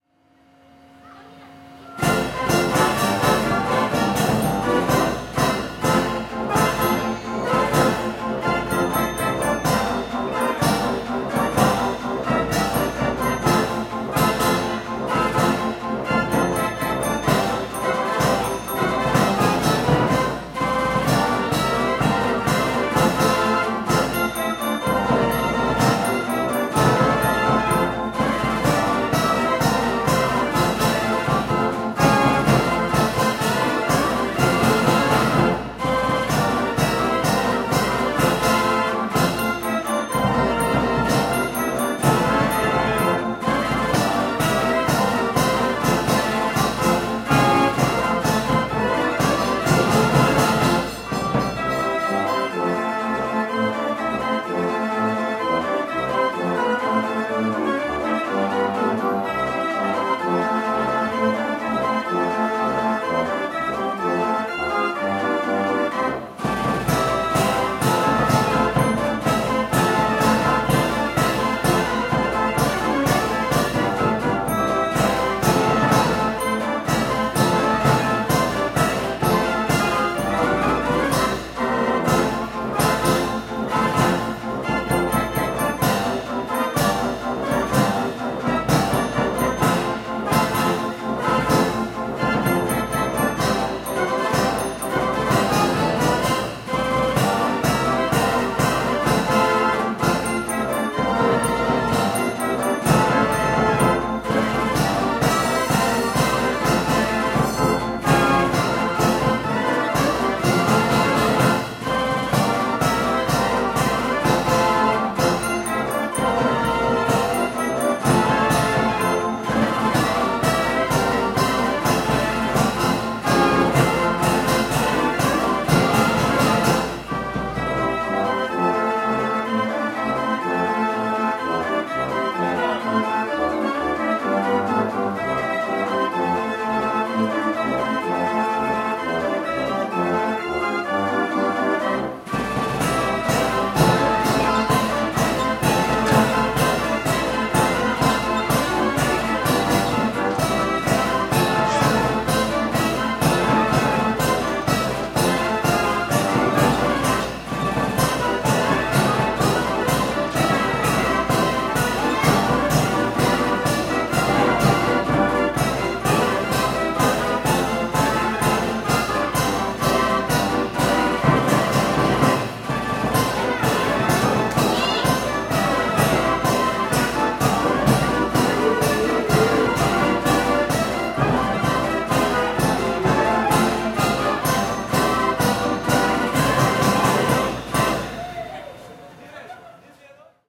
Merry Go Round
Carousel in Griffith Park, Los Angeles, California. Field recording 01/20/2013 using a Sony PCM-D50 with internal microphone and wind screen.